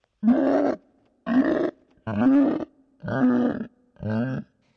Cry of a monster
Dragon, etc, screams